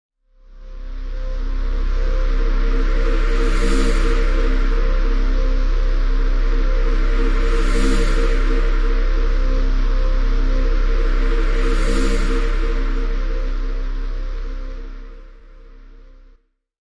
This is a layer of two sounds using multi-sampling in Ableton Live.
One of the sounds is reversed to give the sound a riser type FX
Lead, Saw, Techno, Trance, Synth, Drone